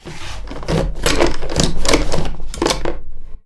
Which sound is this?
10 Buscando cuchillo
Looking for something in a drawer
drawer, find, look-for, pull, wood